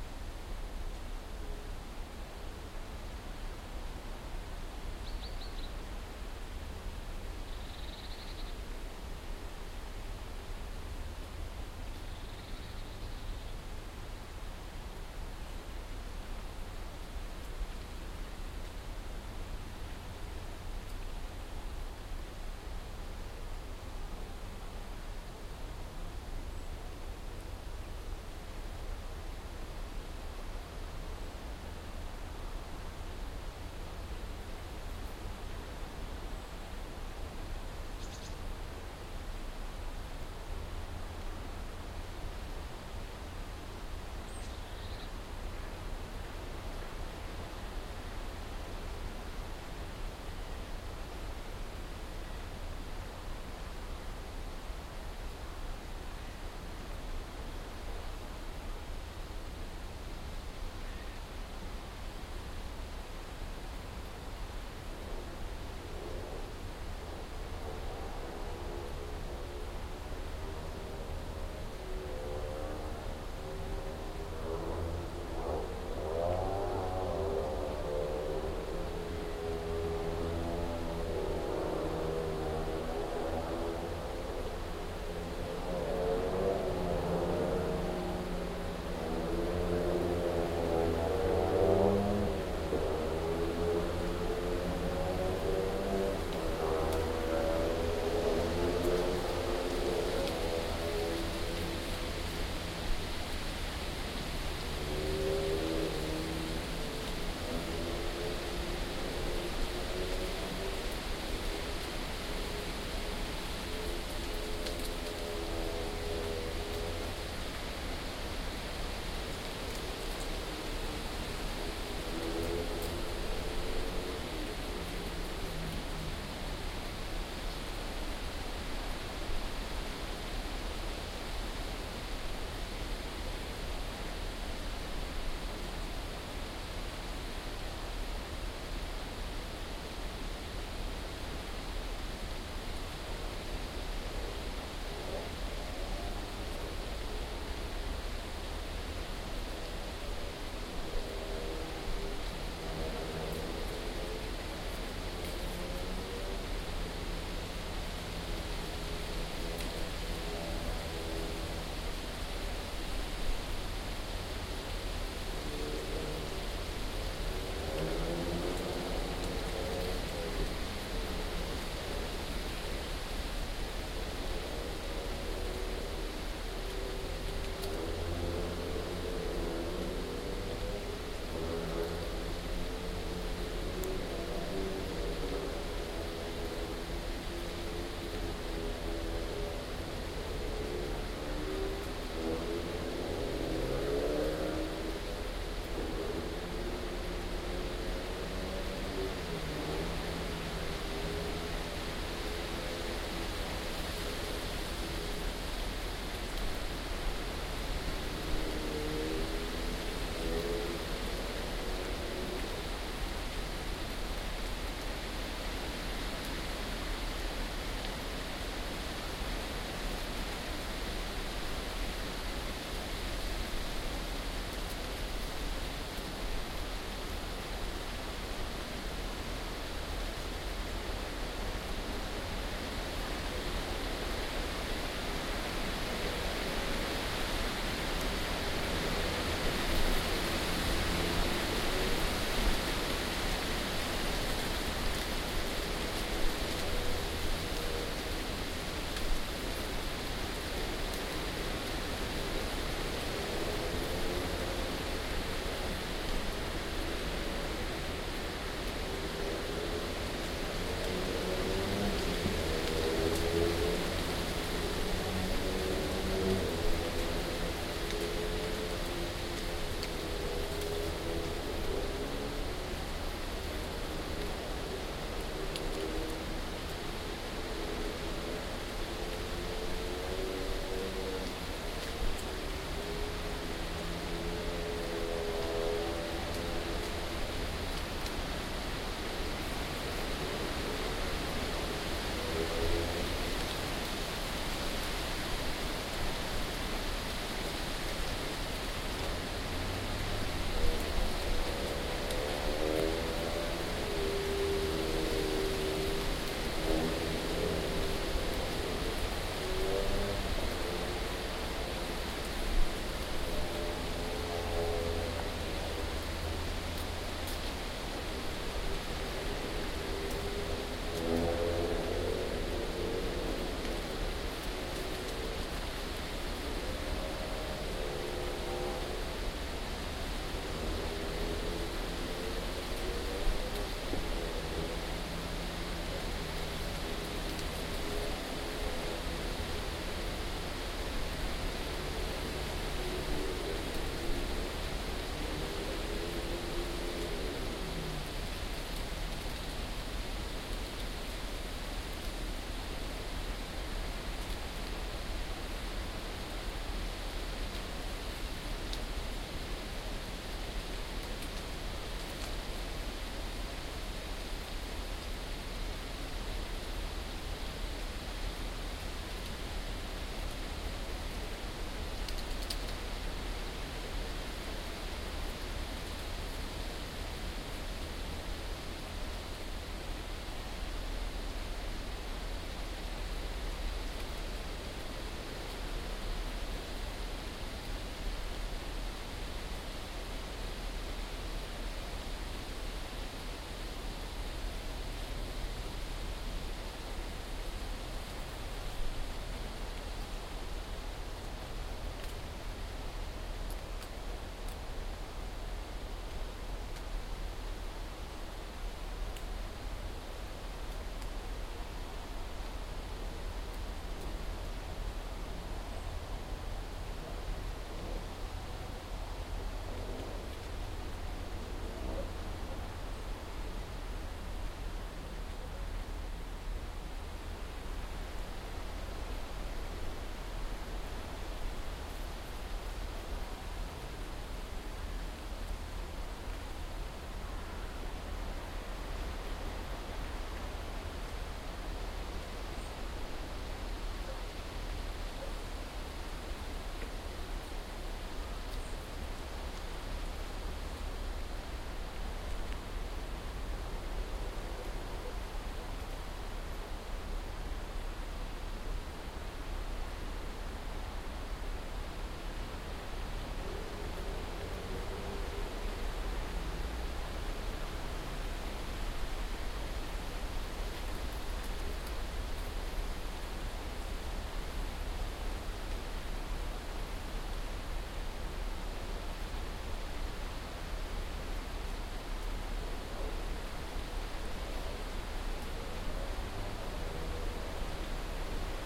Distant speedway
I actually went to the forest to record some crows i saw, earlier on. But after a few minutes the speedway track not far from the forest, awakened and there was no escaping the sound of those bikes. At one point the wind really cranks up the volume, but somehow i avoid to get the recording spoiled by wind in the microphones.
This was recorded with a Sony minidisc MZ-R30 with binaural in-ear microphones. Edited in Audacity 1.3.5-beta on ubuntu 8.04.2 linux.
birds, forest, leafs, speedway, trees, wind